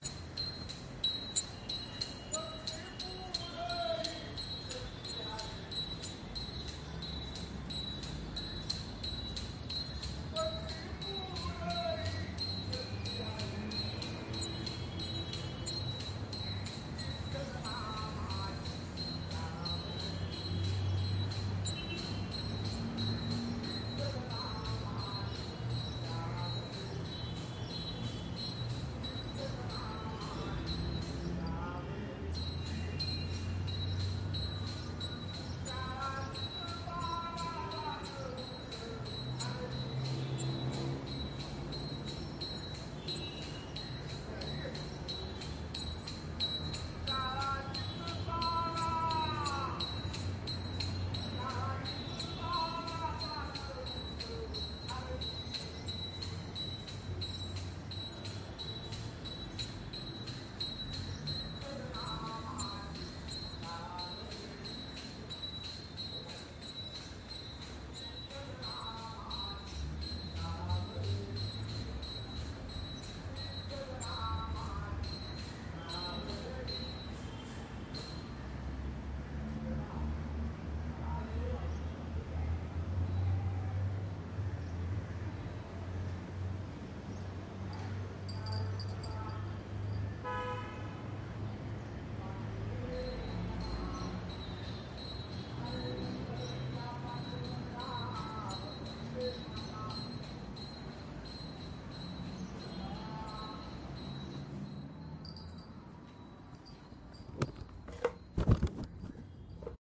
My aunt and her friends singing an aarti during Ganesh chathurthi in Mumbai, India, 2015. Recorded on a zoom h1n